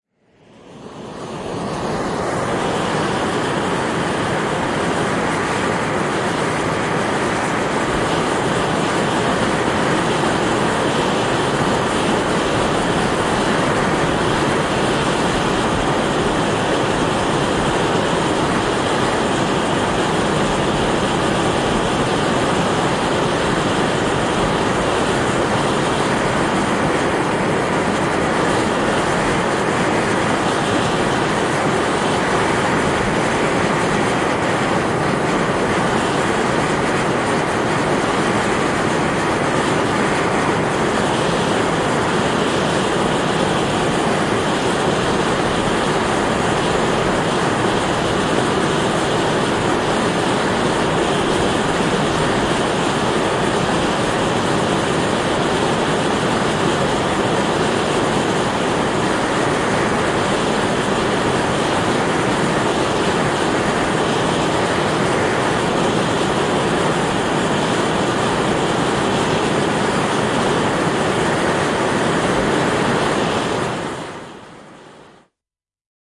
Islanti, höyry, kaasu purkautuu / Iceland, vapour, fumes, sulphur, erupting loudly from underground through a small opening in a heap of stones, hum and buzz, a close sound
Rikkikaasu, höyry, purkautuu maan alta pienestä aukosta, kivikasasta. Kovaa kohinaa ja suhinaa. Lähiääni.
Paikka/Place: Islanti / Iceland
Aika/Date: 1981
Erupt, Eruption, Field-Recording, Finnish-Broadcasting-Company, Fume, Fumes, Iceland, Islanti, Kaasu, Purkaus, Purkautua, Soundfx, Tehosteet, Vapour, Yle, Yleisradio